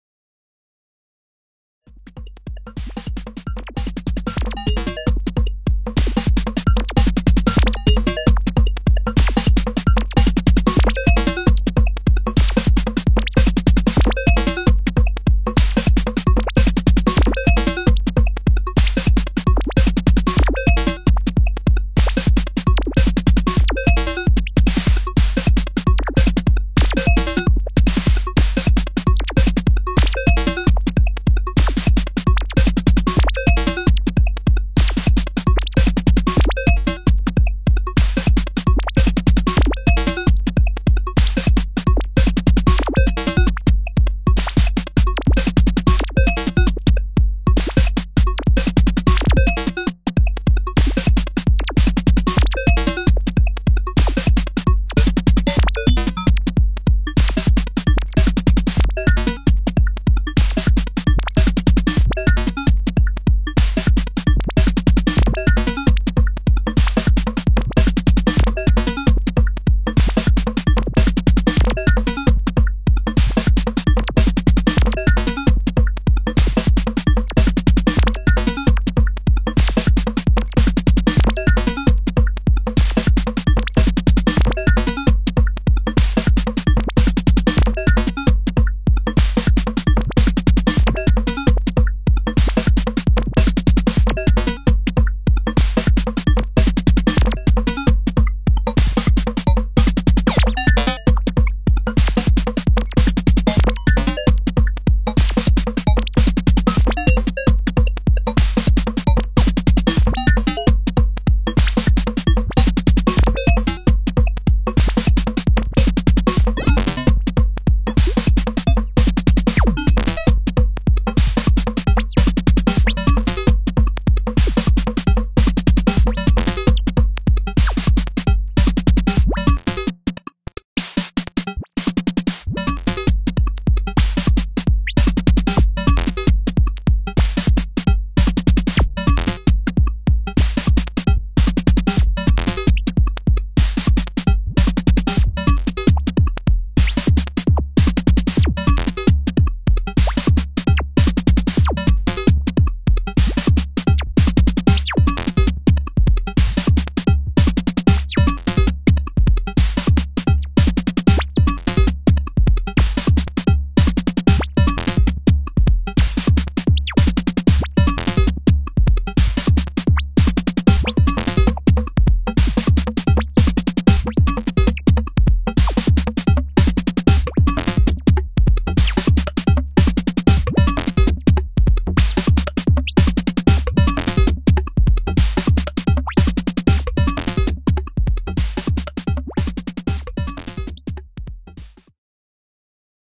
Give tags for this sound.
percussion; percs; percussive; weird; beat; glitch; beats; drums; drum

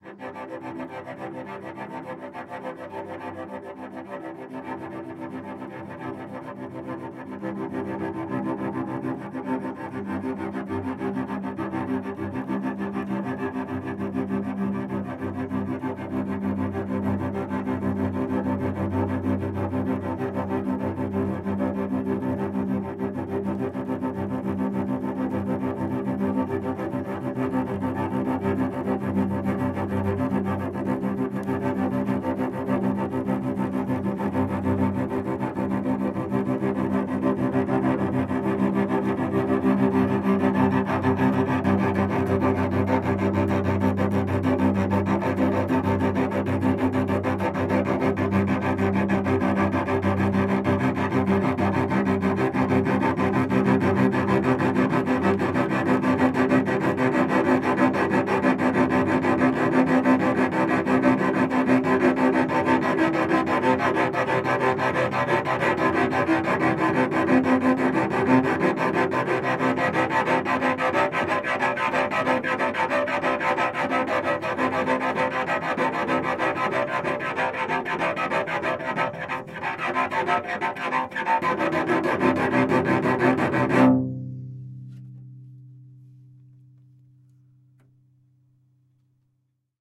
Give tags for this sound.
bow; Cello; concrete; design; film; imitation; instrument; mono; motor; object; objet-sonore; ponticello; quartet; raw; score; scrape; sound; string; sul

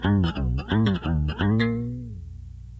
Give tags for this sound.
bass; broken; experimental; guitar; low; notes; pluck; plucked; squirrelly; string; warble

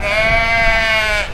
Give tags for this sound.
animal; farm; field-recording; sheep

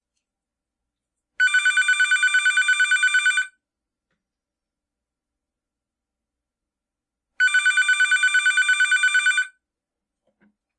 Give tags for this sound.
Telephone; Ringer; Electronic; Phone; Ring; Obnoxious; Dial

